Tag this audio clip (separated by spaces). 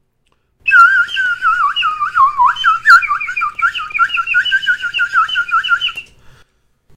nature birds